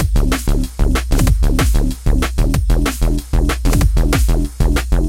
SNS GALLOPPE 3
a free tekno Bass/Drumloop
Made by SNS aka Skeve Nelis
drum, techno, bass, electro, tribe, bassloop, loop, tekno, drumloop